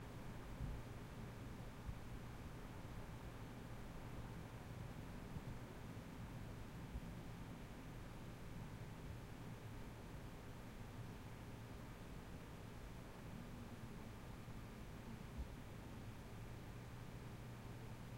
room tone medium quiet Pablo's condo
quiet, medium